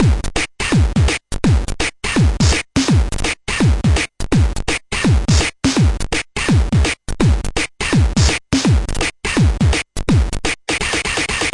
Atari ST Beat 09
Beats recorded from the Atari ST
Atari, Beats, Chiptune, Drum, Electronic